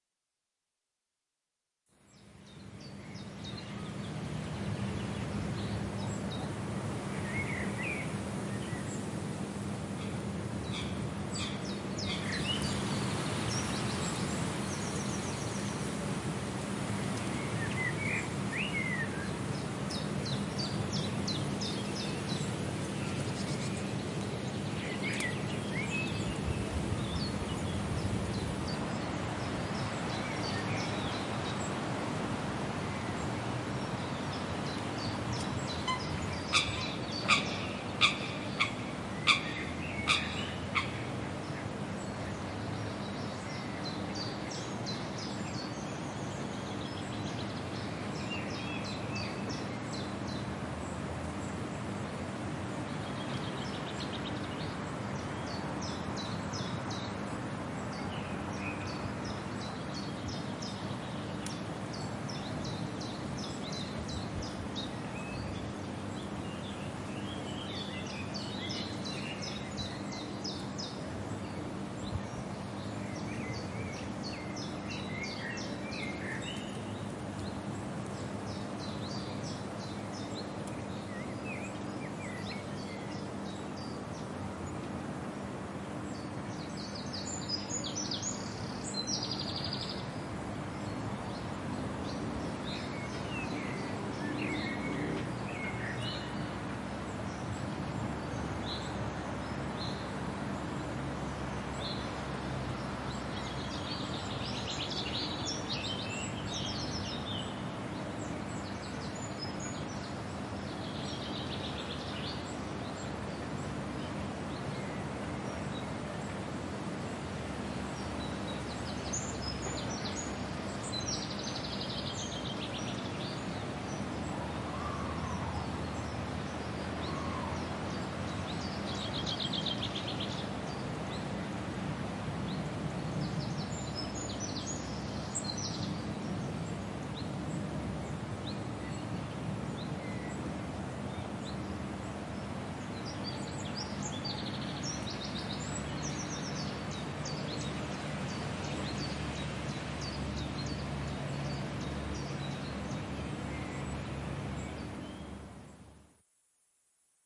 Park spring water birds recording april 2010
Zoom H4n stereo recording of water birds in Hilversum, the Netherlands. Very useful as an ambiance.